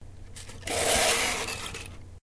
Metal Scrape L-R
Sounds like "crrrrrr!"